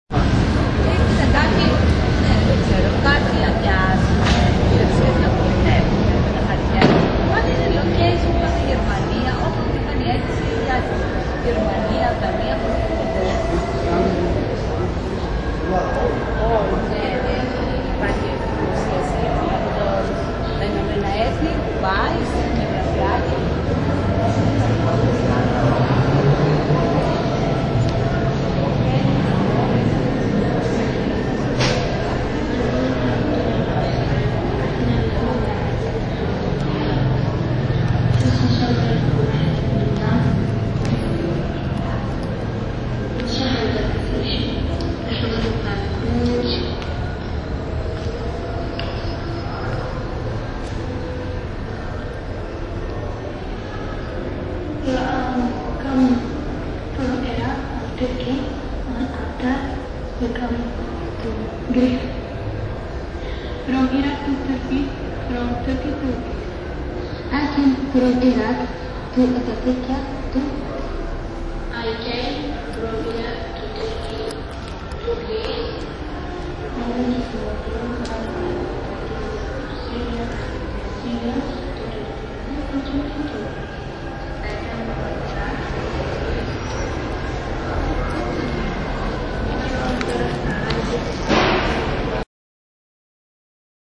athens emst
Athens Museum Of Modern Art